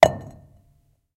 stone on stone impact3
stone falls / beaten on stone
stone, strike